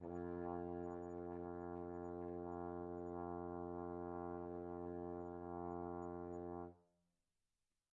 One-shot from Versilian Studios Chamber Orchestra 2: Community Edition sampling project.
Instrument family: Brass
Instrument: Tenor Trombone
Articulation: sustain
Note: E#2
Midi note: 41
Midi velocity (center): 2141
Room type: Large Auditorium
Microphone: 2x Rode NT1-A spaced pair, mixed close mics